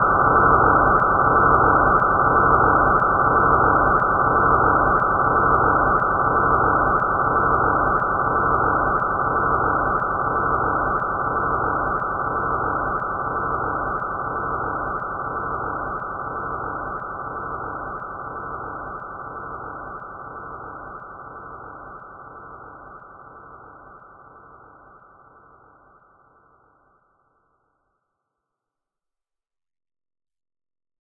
Space Boom
This an echo sound made from a recording of snapping fingers recorded with a Skullcandy Crusher Wireless headset. Then edited with Audacity.
sci-fi, snap, cinematic, weird, dark, processed, space, atmosphere